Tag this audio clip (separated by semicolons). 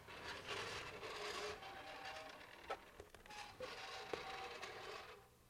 fabric; hiss; cloth; metal; object